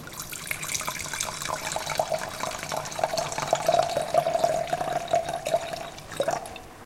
wine being poured from a bottle into a glass cup. Olympus LS10 internal mics
cup, liquid, pouring, water, wine